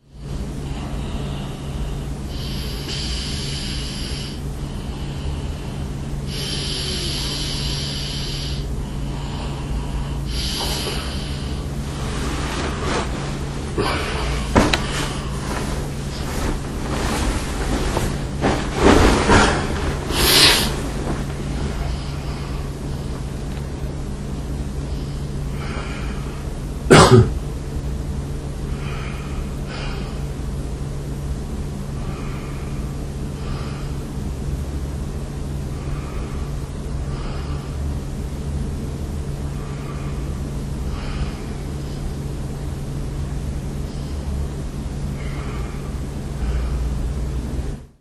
Moving while I sleep. I didn't switch off my Olympus WS-100 so it was recorded.